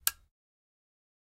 Desk lamp switch
My desk lamp's rotary switch clicking. Recorded for an animated logo treatment I created.
click, switch